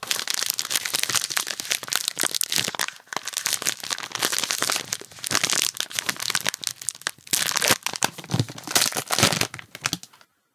Open plastic packaging
Opening a plastic packaging. Recorded with Shure SM7B
open, packaging, plastic